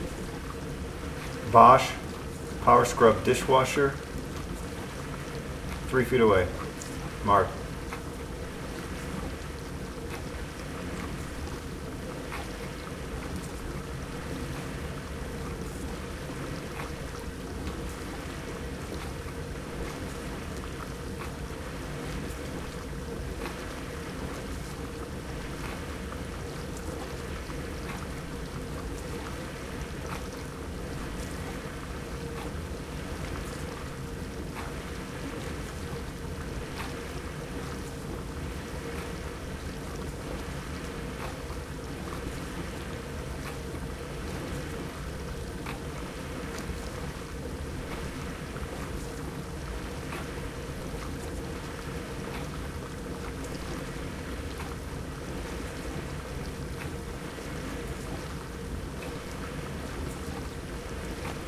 Bosch Dishwasher Sound - 3 Feet in Front
Just motor sounds of dishwasher running in kitchen with hard floor, and hard lid. Recorded with Zoom H4n built in mics.
bosch, dishwasher